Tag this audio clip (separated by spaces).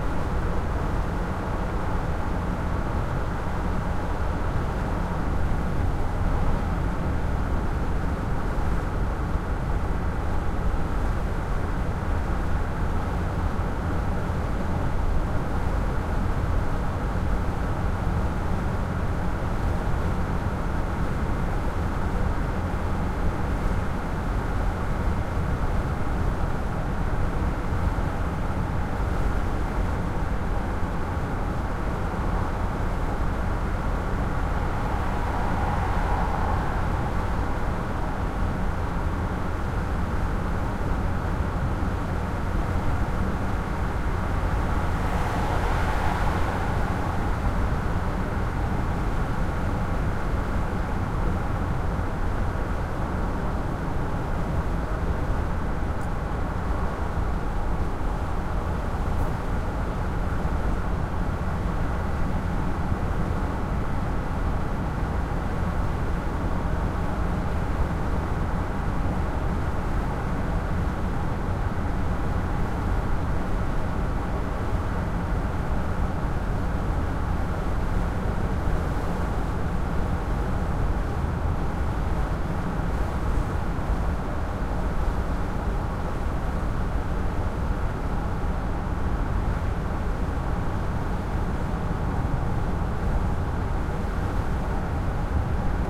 car drive driving engine motor road van vehicle volkswagen wind